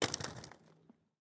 Skate-concrete 6
Concrete-floor, Rollerskates